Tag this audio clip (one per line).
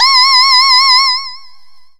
basic-waveform
multisample
pulse
reaktor